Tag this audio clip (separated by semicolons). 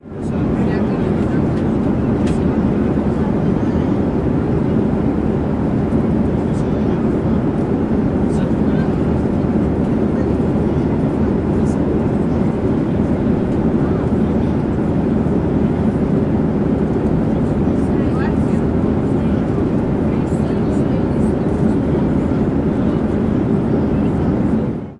flying; journey; ambient; In; up; atmosphere; trip; airplane; ambience; travel; flight-attendant; high; sky